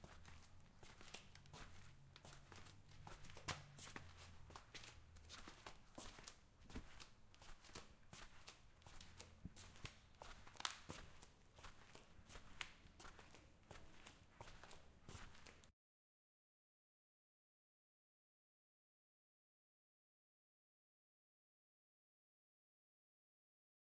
This is an XY recording of a sticky foot person, in flip-flops Slowly walking on ceramic tiles